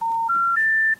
More 'special information tones' or SITs you hear when a call has failed. It consists of three tones with rising frequency.
beep, error, information, phone, SIT, SITs, special, telephone, tones